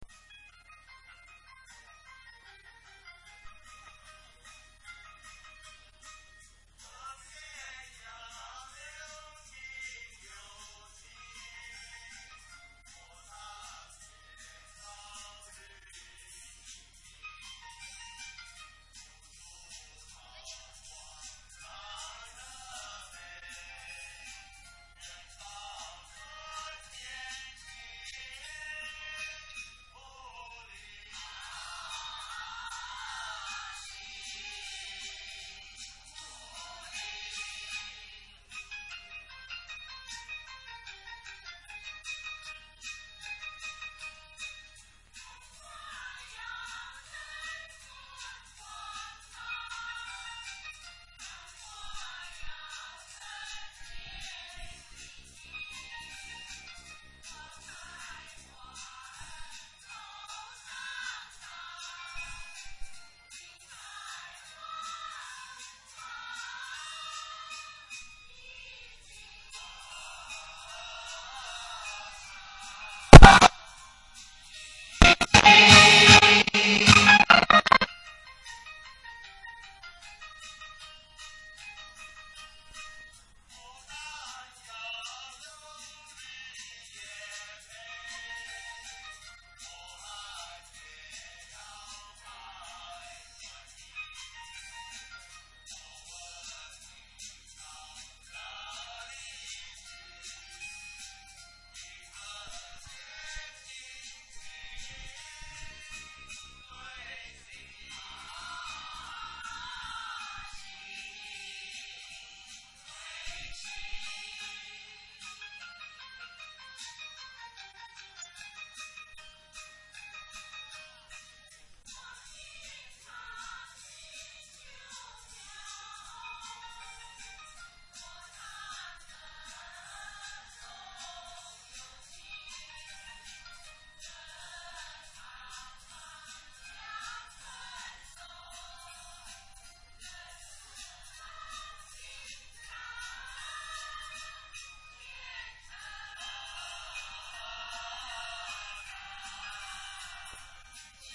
karaoke party on the 2005/06 new years eve
bangkok, drunk, karaoke